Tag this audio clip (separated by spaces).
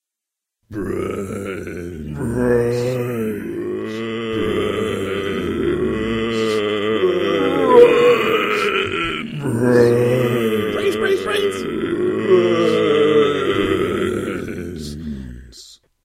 zombie-stampede brains